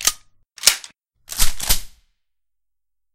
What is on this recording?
Rifle-or-shotgun-reload
I again found several sounds of reloading weapons on Friesund, cut off each of them and was tied to Audacity. I again made my sound, reloading weapons with blackjack and sounds.
airsoft, ammo, ammunition, aug, bullet, clip, cock, gun, handgun, load, magazine, pistol, reload, rifle, shotgun, weapon